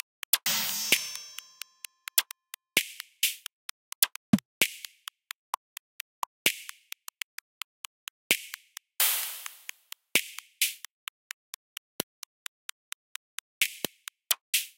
GlitchDybDrumVerse 130bpm
glitch, loop, drum